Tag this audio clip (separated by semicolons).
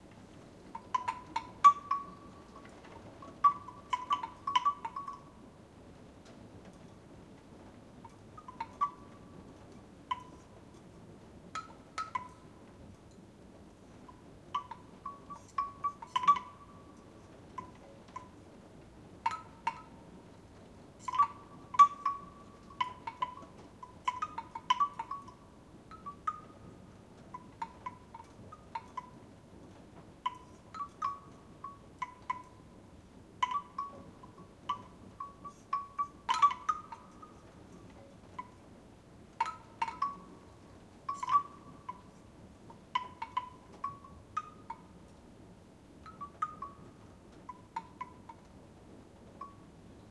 chimes; chime; bambou